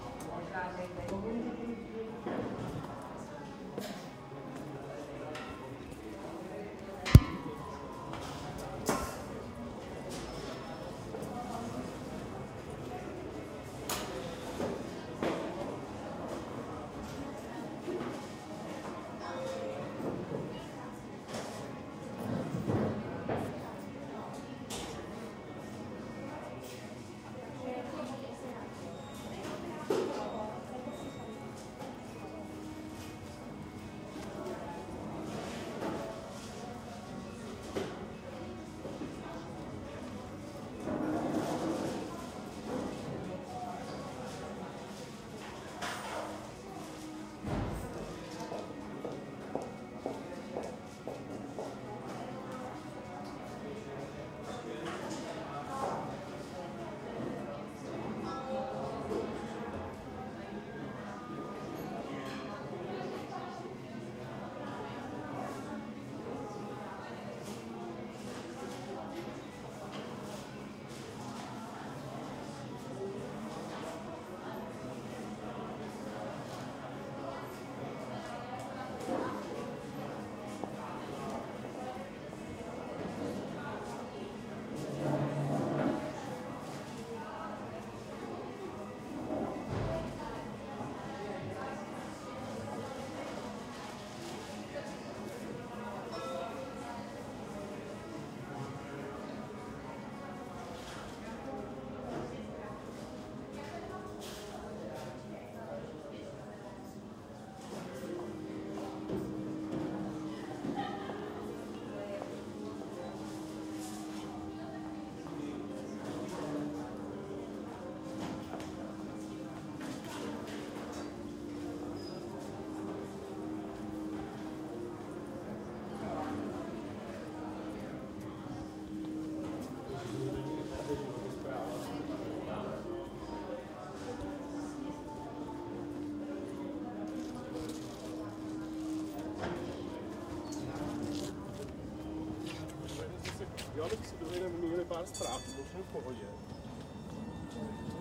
fast food shop ambience
Ambience from a czech fast food shop
fast-food, people, shop